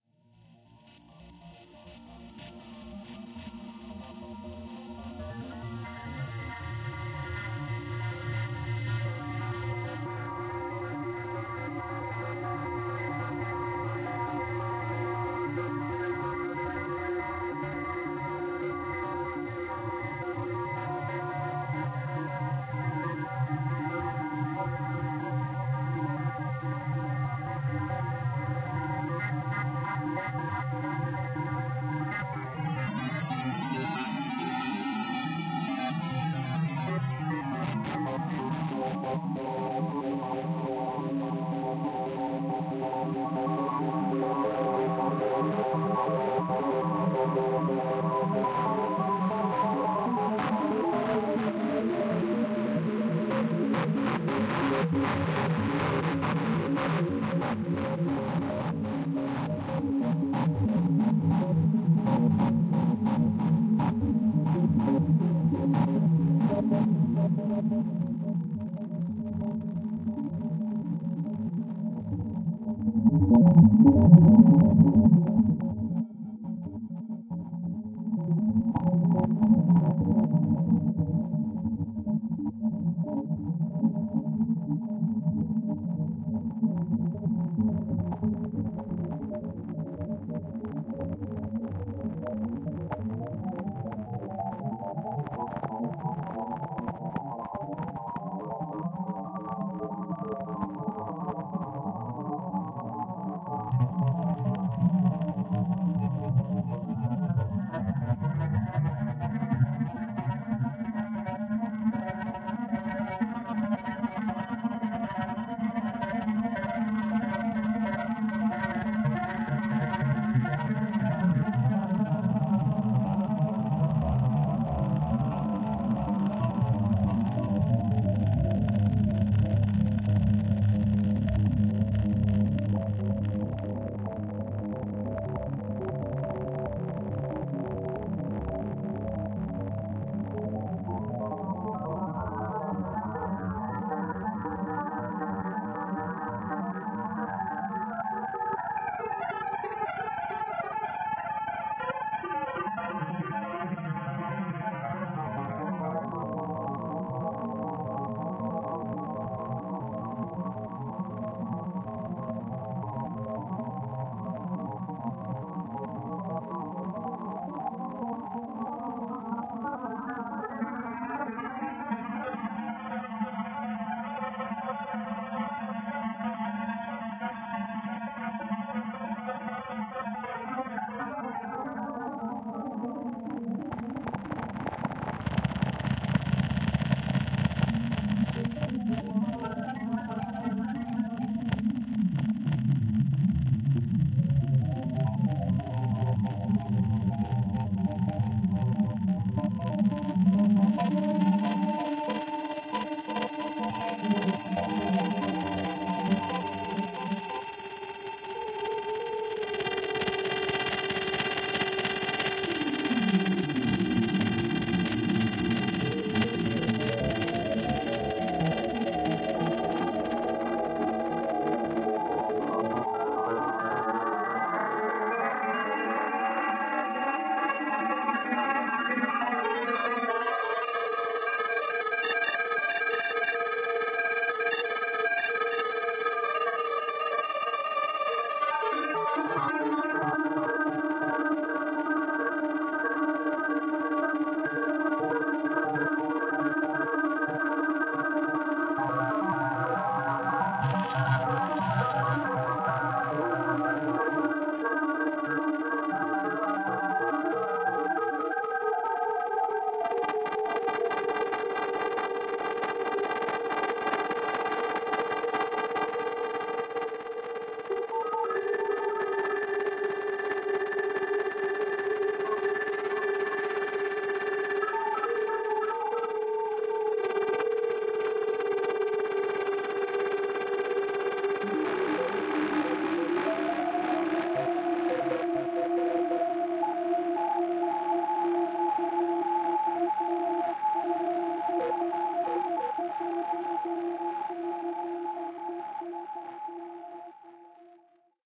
These sounds have been created in Ableton Live by a 'noise generating' VST which generates noise when fed any audio (or indeed, silence).
The audio signal then feedsback on itself. Sometimes some sort of filter was placed in the feedback loop and used to do filter sweeps.
I control some of the parameters in real-time to produce these sounds.
The results are to a great extent unpredictable, and sometimes you can tell I am fiddling with the parameters, trying to avoid a runaway feedback effect or the production of obnoxious sounds.
Sometimes I have to cut the volume or stop the feedback loop altogether.
On something like this always place a limiter on the master channel... unless you want to blow your speakers (and your ears) !
These sounds were created in Jul 2010.